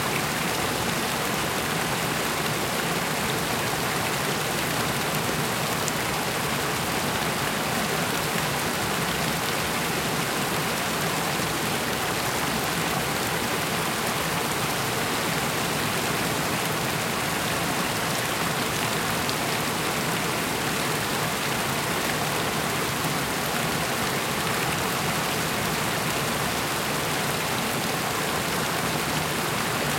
Ambiance Waterfall Small Close Loop Stereo
Waterfall - Small Size - Close Recording (loop)
Gear : Sony PCM D100
field-recording, water, loop, relaxing, pcmd100, ambiant, nature, recording, clean, flow, stream, sony, watefall, close, creek, ambient